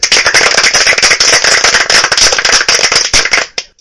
Crowd clapping. This was made by me clapping over and over again then making the final product by taking the multiple recordings, and putting them all together in one recording. All sounds were recorded using a CA desktop microphone, and were put together in Audacity.
clap, polite, audience, applause, clapping